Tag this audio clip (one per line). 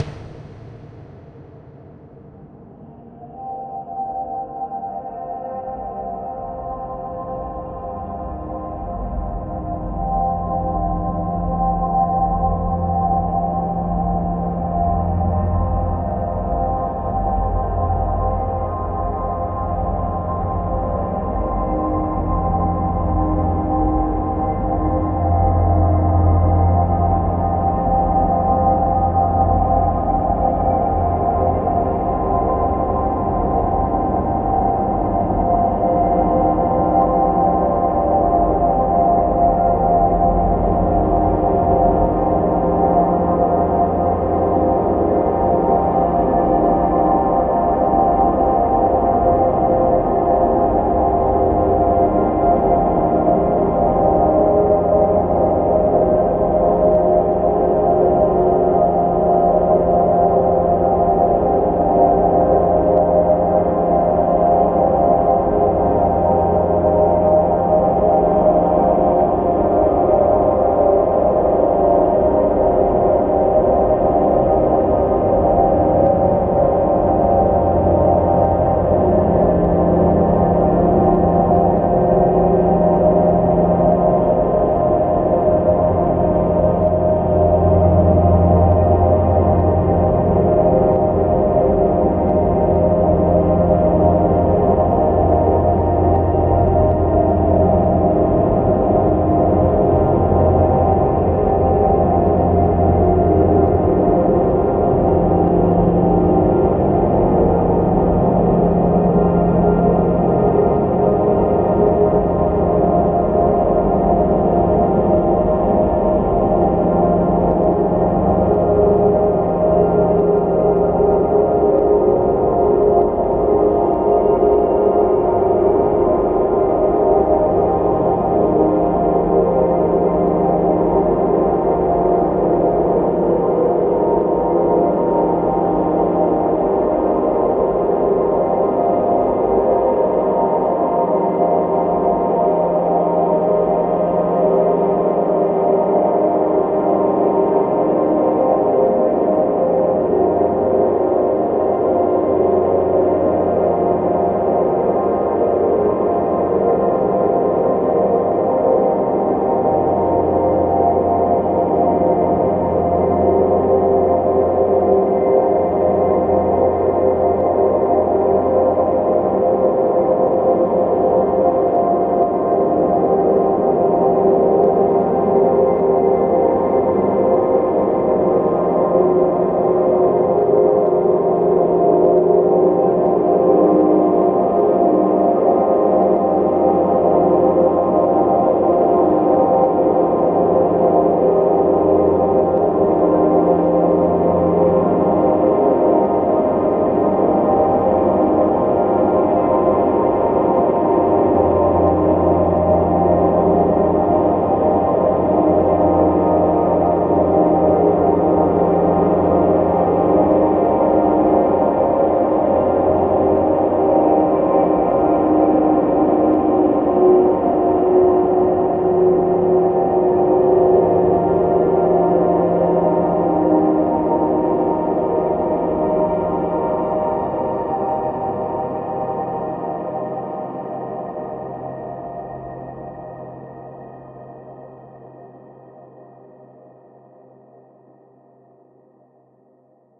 ambient
artificial
divine
dreamy
drone
evolving
multisample
pad
smooth
soundscape